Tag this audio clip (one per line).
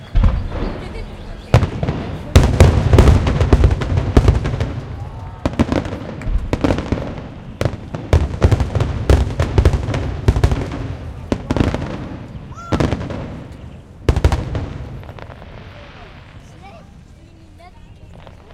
slapback
fireworks
Canada
Montreal
reflection
echo
big